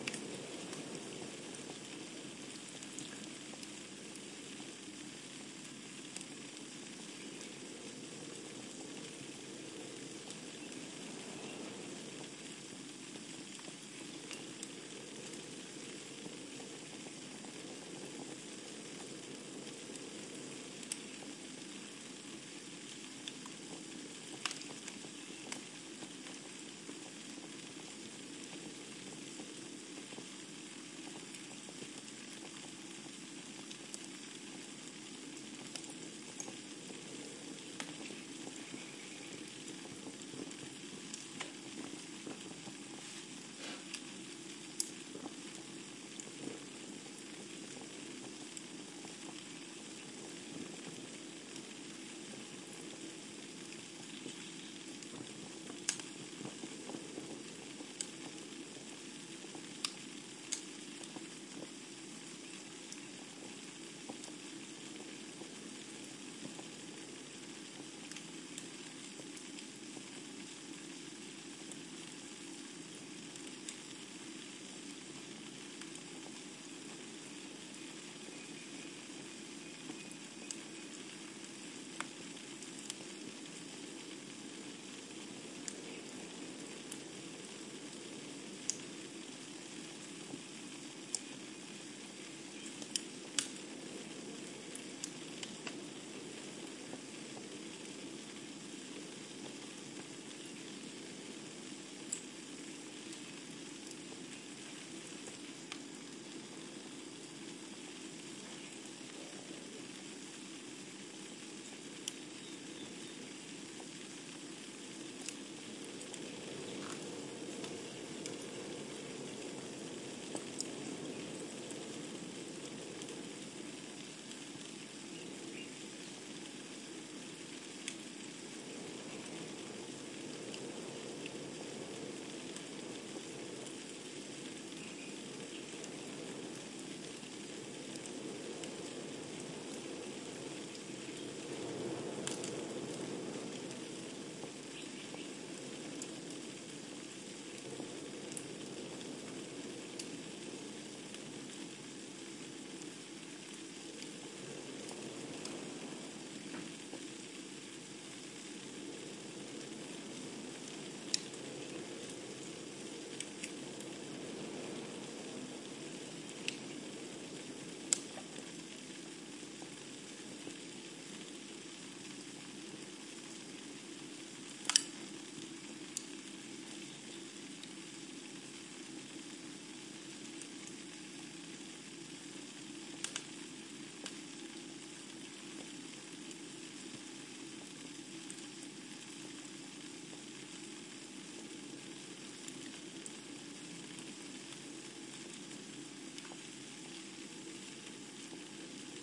Noise of fireplace in small room. Audiotechnica BP4025, Shure FP24 preamp, PCM-M10 recorder. Recorded at La Macera countryhouse (Valencia de Alcantara, Caceres, Spain)
burning, combustion, field-recording, fireplace, fireside, flame, furnace, home, stove, winter